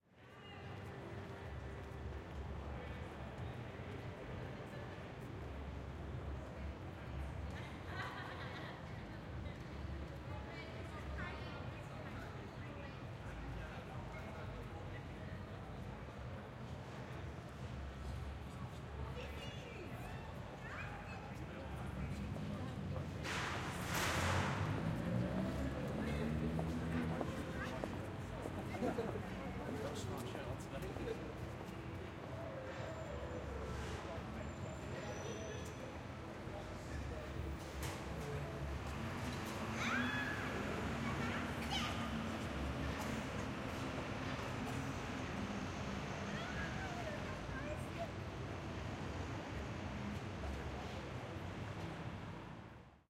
A selection of ambiences taken from Glasgow City centre throughout the day on a holiday weekend,